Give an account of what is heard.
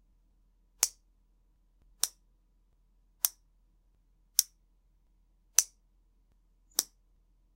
Soft clicks, kinda nice